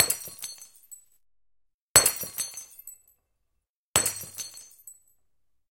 Breaking glass 11
A glass being dropped, breaking on impact.
Recorded with:
Zoom H4n on 90° XY Stereo setup
Zoom H4n op 120° XY Stereo setup
Octava MK-012 ORTF Stereo setup
The recordings are in this order.